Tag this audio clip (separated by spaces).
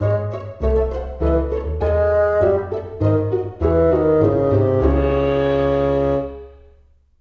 double violin transition duck jingle music short orchestra bassoon bass classical